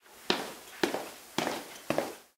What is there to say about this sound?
Footsteps Inside Light - Foley
Walking inside with shoes
footsteps, inside, shoes, walking